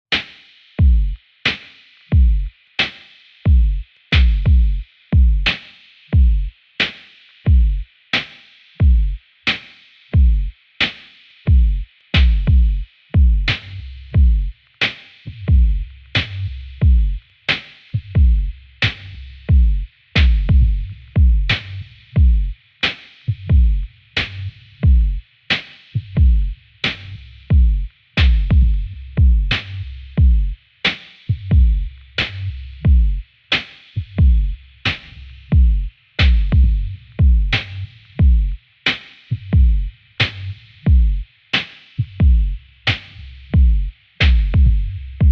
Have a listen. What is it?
Hip-hop sex drum.
Synth:Ableton Live,Kontakt.
loop, drum, Hip-hop, beat, sex, quantized, bass, drum-loop, dance, original, percs, percussion-loop, rhythm